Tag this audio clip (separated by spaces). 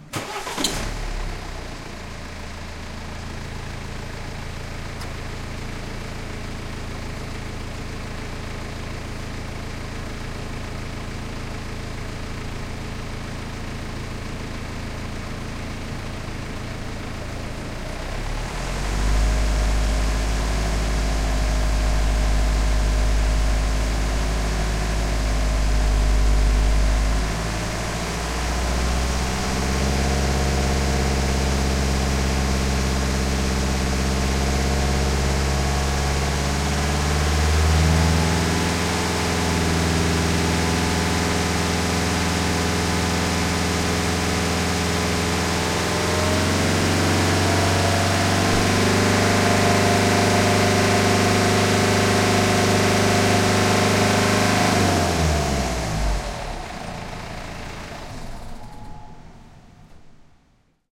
accelerator car engine ignition motor start stop